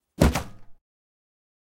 Some kind of game sound, i figured it can be used when trying to click on a menu option that is unavalable.
computer, game, interface, denied